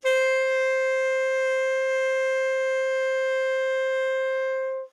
Alto Sax C5
The C5 note played on an alto sax
alto-sax, instrument, jazz, music, sampled-instruments, sax, saxophone, woodwind